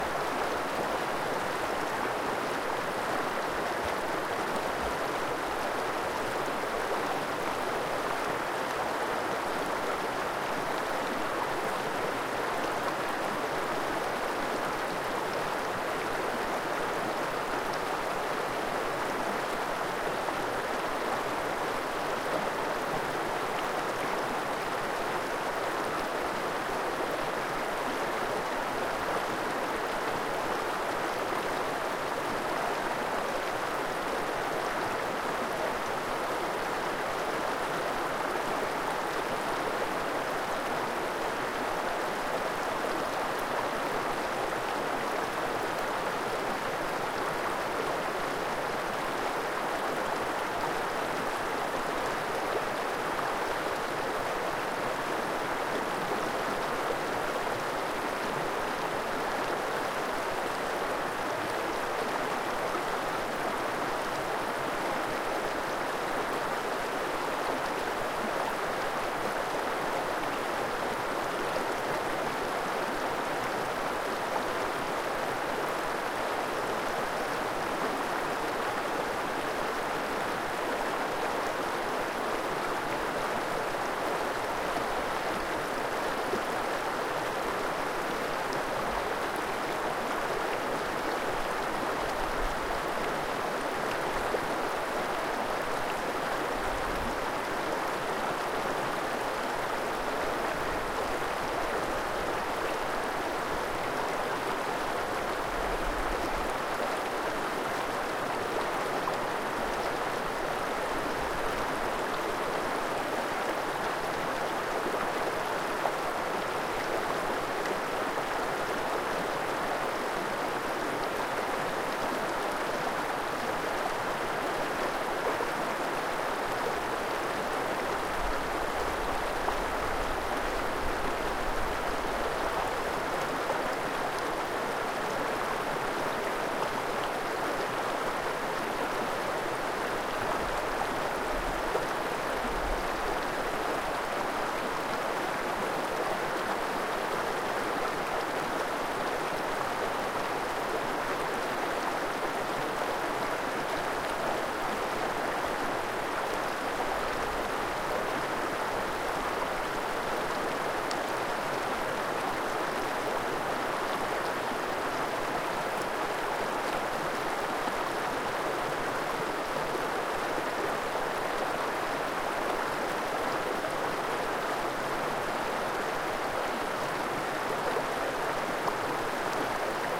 Khasaut river
Mountains river Khasaut, Karachay-Cherkessia, Russia
creek, mountains, water, stream, flow, river, rustling, rustle